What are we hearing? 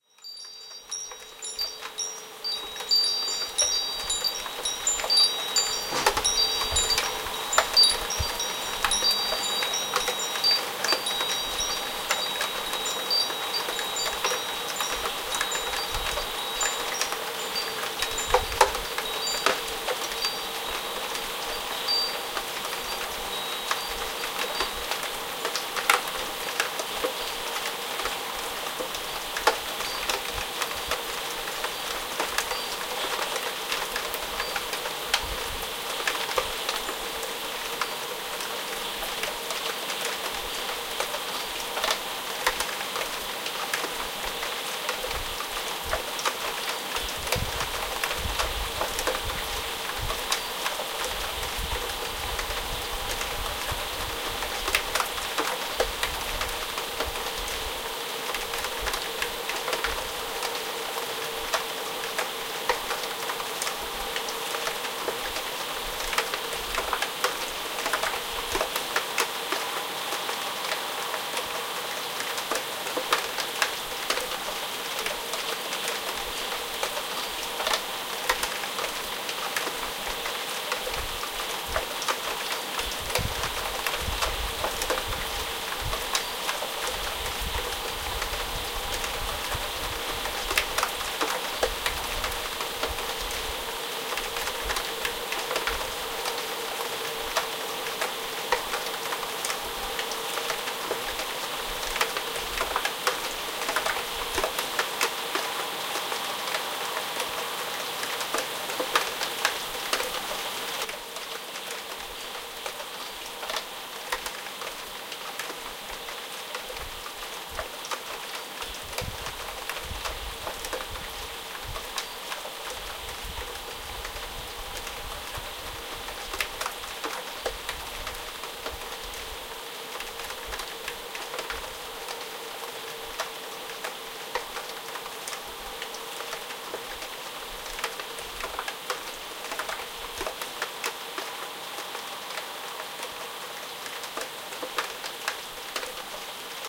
Rain and Windchimes
Heavy rain on the kitchen windowsill, with the sound of windchimes at the start when I open the window. Recorded on a Yamaha Pocketrak PR7.
Weather, Rain